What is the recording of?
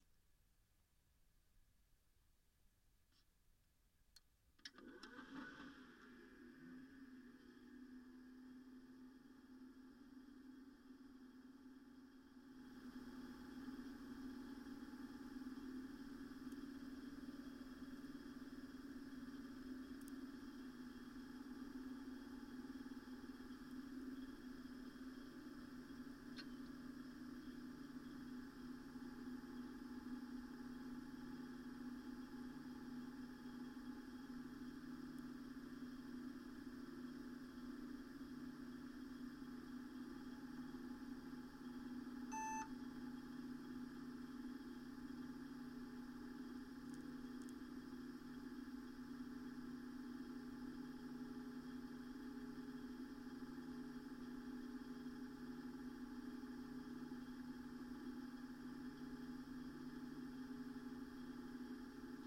CPU Motor
This is the recording of my CPU running through Korg 300 Contact microphone.
recording, korgcm300, contactmic